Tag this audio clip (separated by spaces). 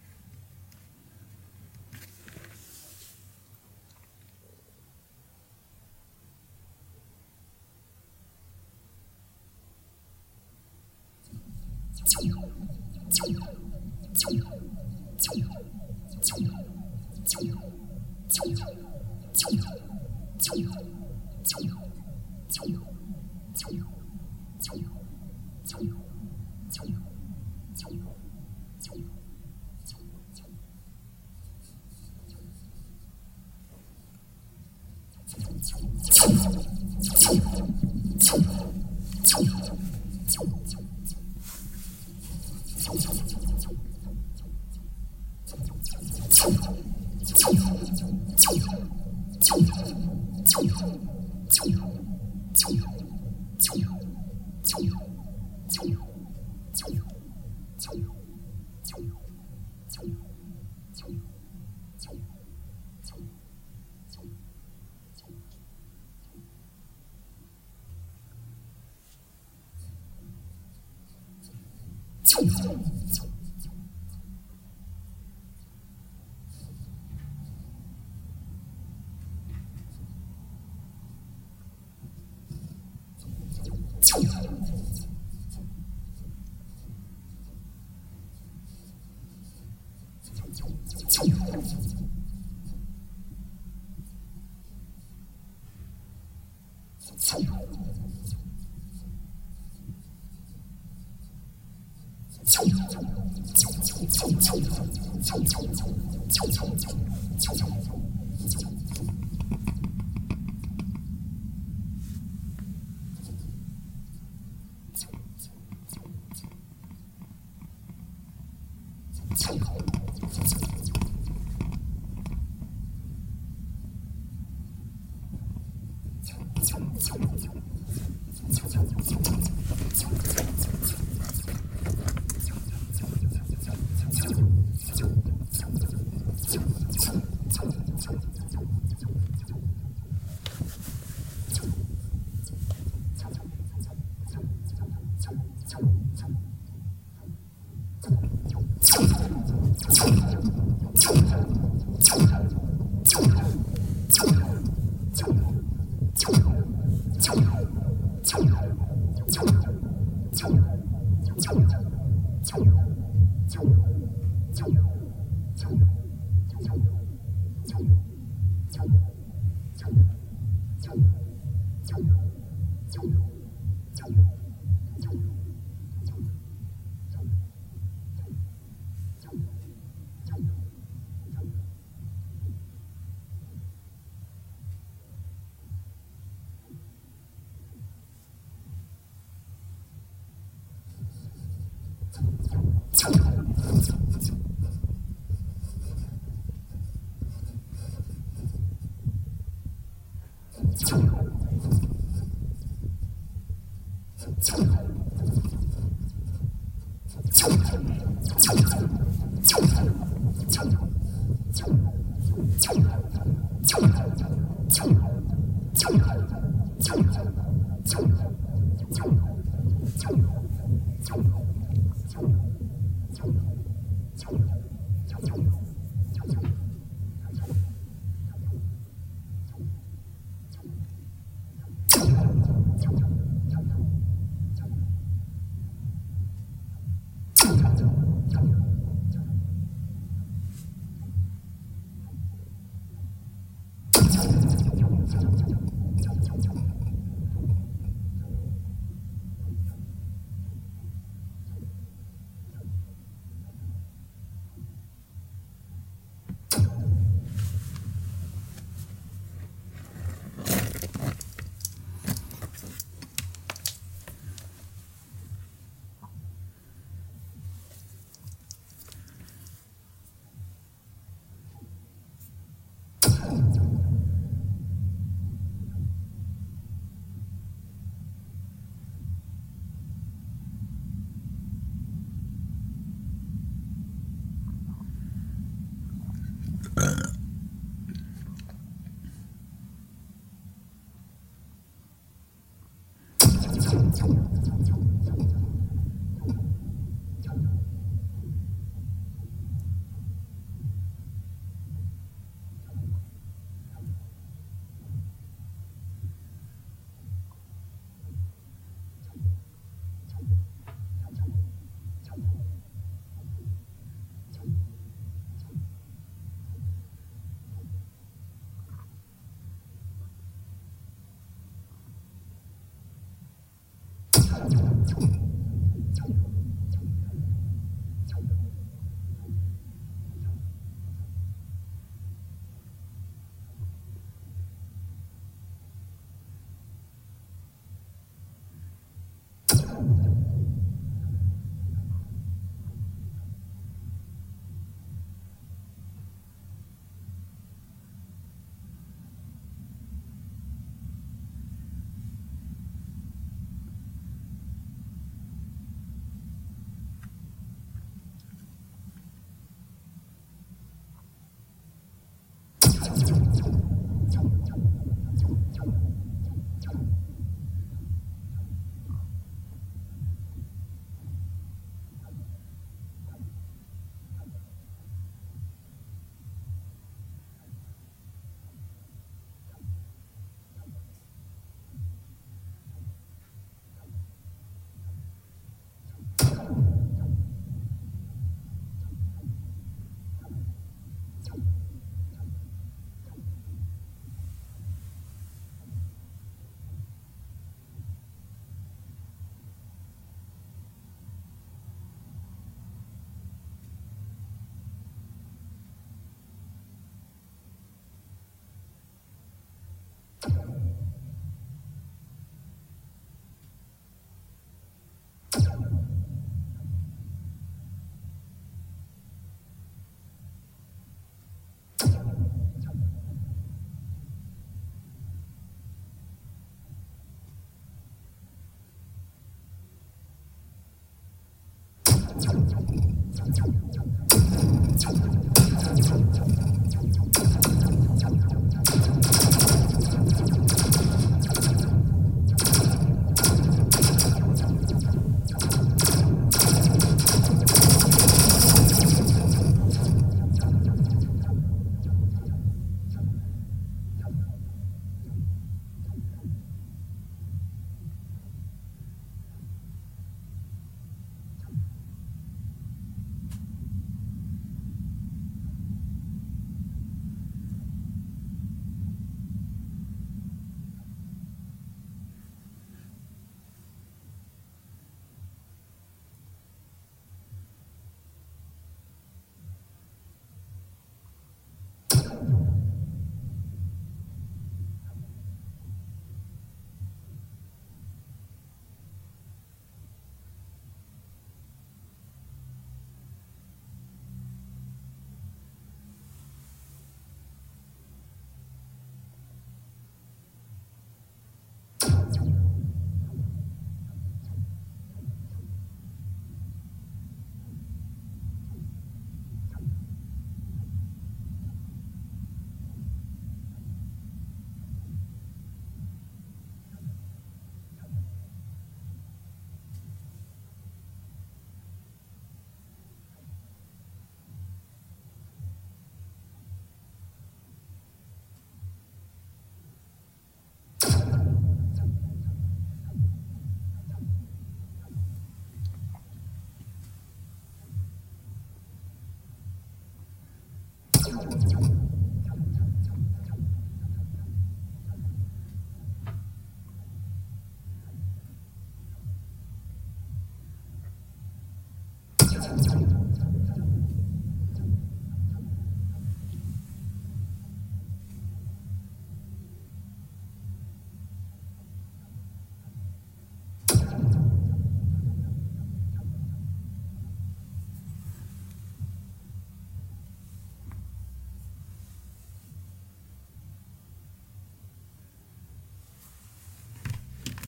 shooting electronic pistol shot war space aggression laser-sound shotgun space-wars gun army laser-shot laser weapon attack military fight star-wars space-invaders